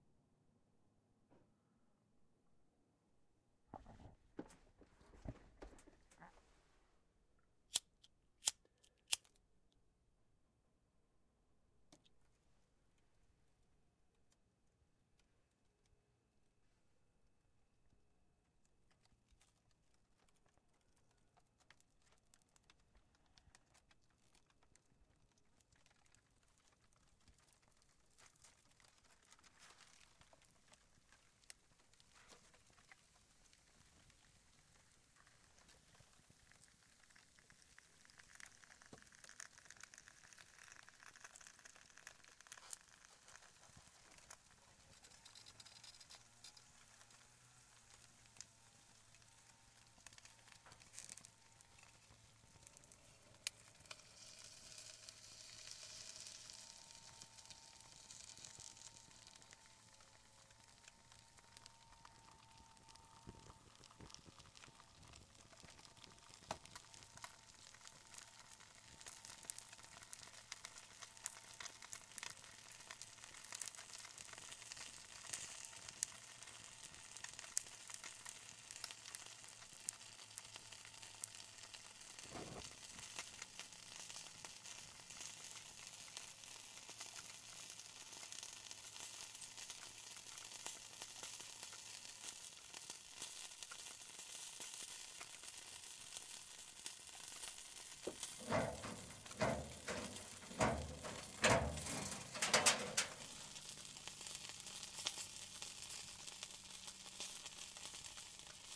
burning fastfood wrappers
flame, wrappers